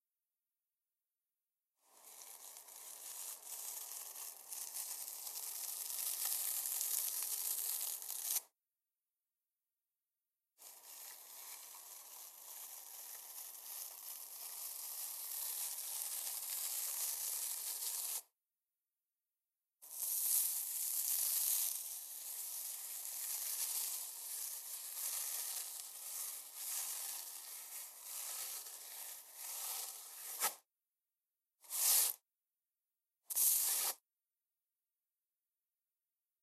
JBF Finger on TurfEdit
turf, finger